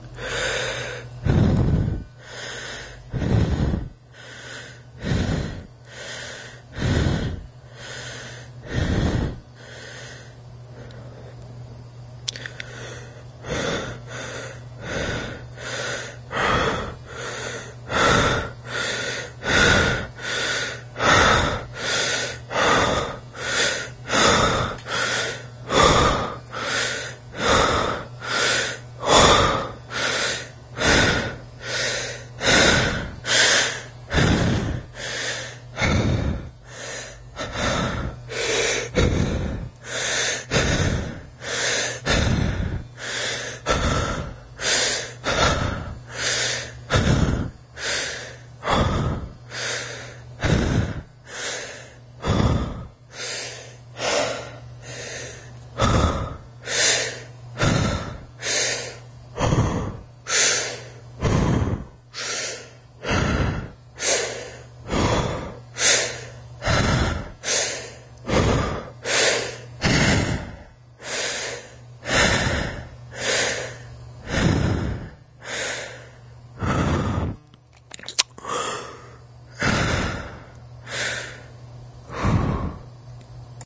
Me breathing heavily as if I was running. I recorded this for a film I was making. Sorry about the mic position, I didn't have time to set it up correctly, although the effect it produces worked for the film.